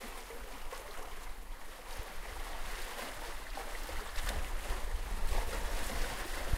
Waves at the beach.
beach, coast, ocean, rocks, Scotland, sea, Waves